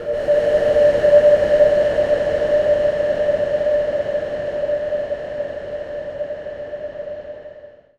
SteamPipe 3 GhostBlow G#3
This sample is part of the "SteamPipe Multisample 3 GhostBlow" sample
pack. It is a multisample to import into your favourite samples. A pad
sound resembling the Ghost blow preset in the General Midi instruments
from several manufacturers. In the sample pack there are 16 samples
evenly spread across 5 octaves (C1 till C6). The note in the sample
name (C, E or G#) does not indicate the pitch of the sound but the key
on my keyboard. The sound was created with the SteamPipe V3 ensemble
from the user library of Reaktor. After that normalising and fades were applied within Cubase SX & Wavelab.